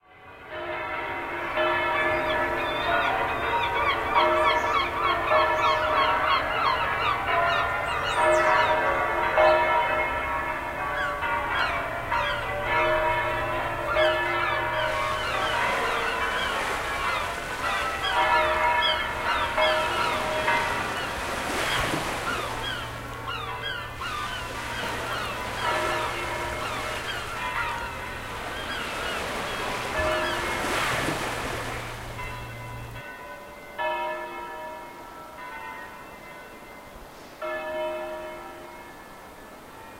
20061014.impossible.mix
seagulls, flickr, subreal, britain, seawaves, spain, mix, bells, field-recording, sevilla, ocean, birds